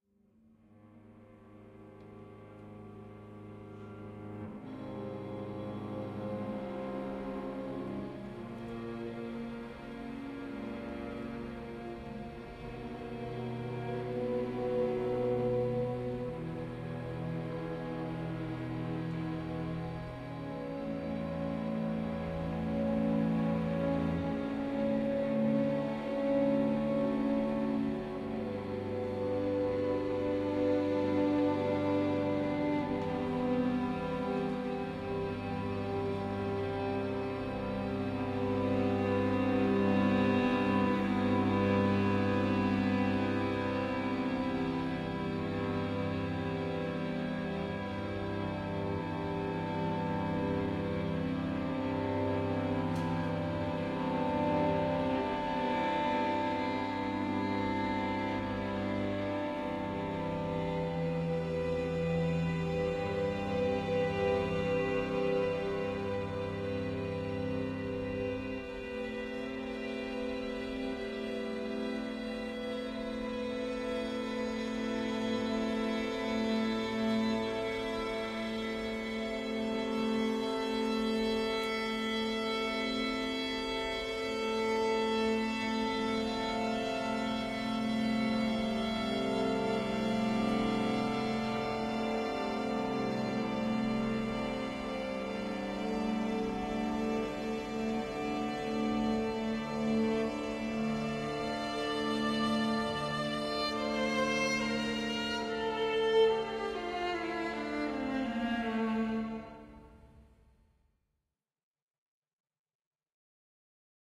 wttmd stringquartet 2layers
The Hague String Variations played some pieces of a composition of mine in 2007. This is part of the introduction and recorded at an exercise session. It was played twice and the two tracks were added on top of each other to make it a bit 'fuller'. Also a reverb was added. This sample was later used as a 'building block' for some electronic music processing work.
atmosphere, cluster, drone, quartet, slow, soundtrack, string